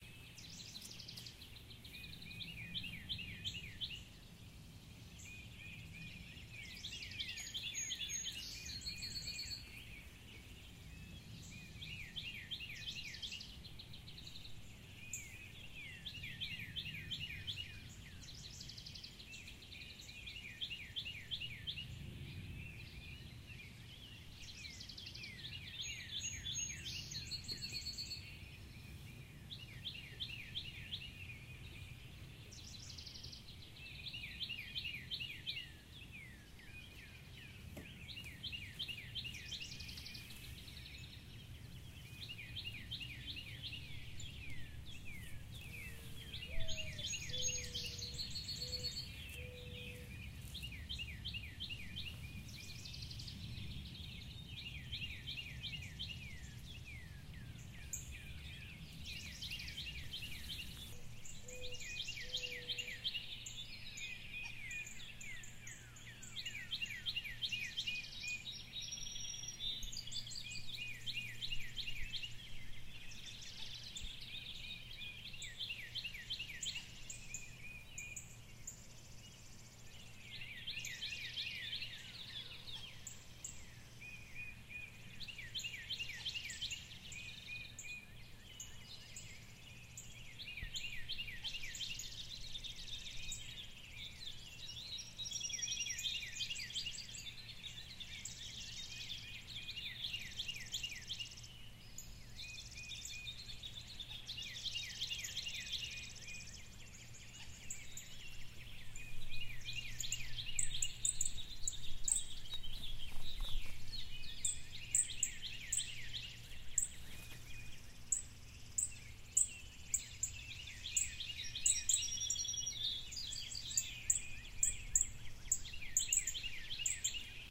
Birds in Spring
Collected on a spring morning in Chapel Hill, NC. General soft bird sounds. Recorded with Zoom H4n
spring, birdsong, bird, birds, morning